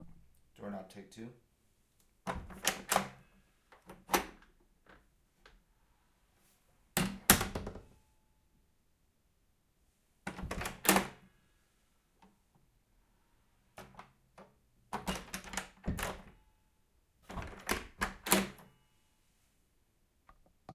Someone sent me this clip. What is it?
AAD Door Knob 1 - 1
This is one of our raw recordings no treatment. This is an M-S Stereo recording and can be decoded with a M-S Stereo Decoder.
Creak, Creepy, Door, door-Knob, House, Metal, Old, Wood